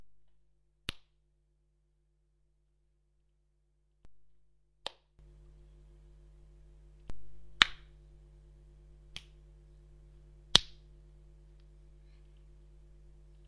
Punching-Hits
My First Sound Making A Punching Sound From My Gembird Microphone.Hope You Enjoy it.